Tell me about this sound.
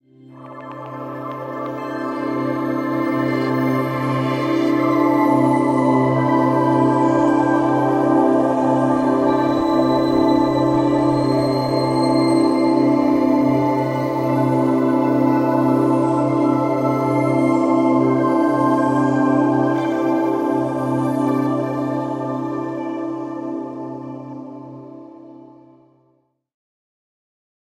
Soundscape made for theatre. Used Cubase and Reason